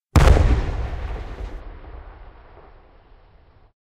A stereo field-recording of a controlled explosion on a railway cutting.I was too slow to record the warning siren and a lorry ruined the all clear. Edited for bird noise. Zoom H2 front on-board mics.
bang, blast, blow-up, detonation, discharge, explosion, field-recording, stereo, xy